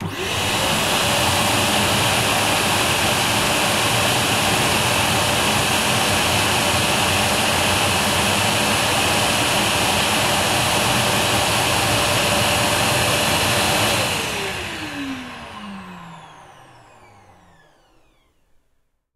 The sound of big vacuum cleaner
I hope you like it!
Please write if you use my sound, it will be nice for me :)
Recorder:zoom h4n